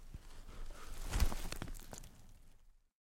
fall to ground
body falling to ground
body, fall, falling, ground